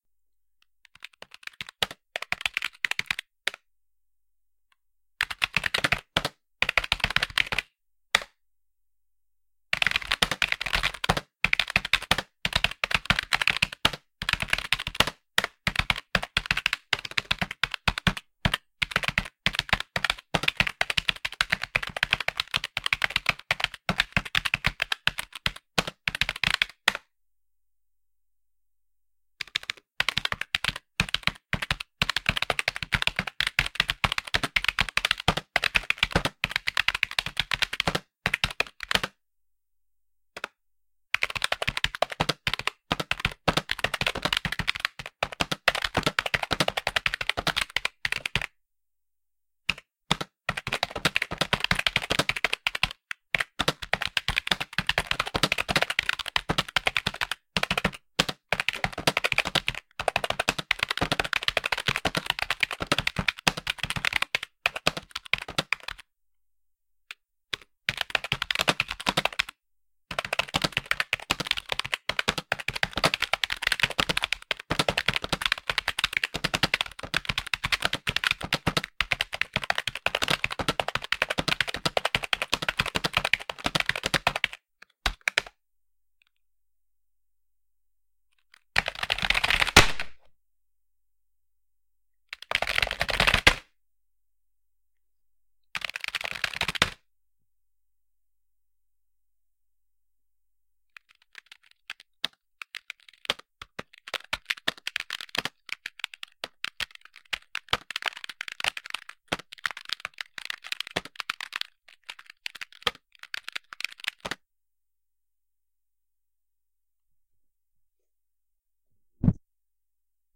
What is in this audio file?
Some typing on a standard desktop PC keyboard (but you may use it as the sound of a laptop keyboard too). Recorded with a dynamic mic and a directional, condenser mic in a small room, filtered and compressed to minimize noise.